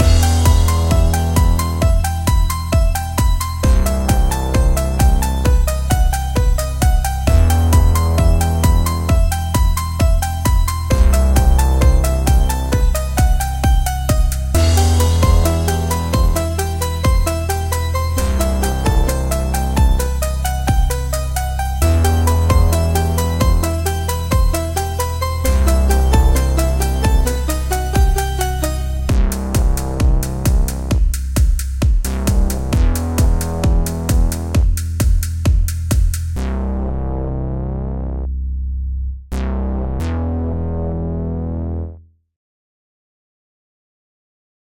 I used Ableton Live and used a loop with added bass and a simple drum beat.